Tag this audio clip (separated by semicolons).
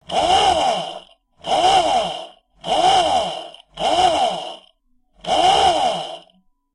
hand-drill; sound-effects; tools; machine; mechanical